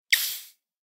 Bicycle Pump - Plastic - Fast Release 10
A bicycle pump recorded with a Zoom H6 and a Beyerdynamic MC740.
Gas, Pump